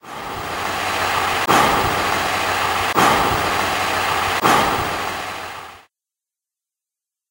Stereo recording of breath with Audacity. In effect, I worked on noise removal and the selection is repeated three times with a fade out and a fade in.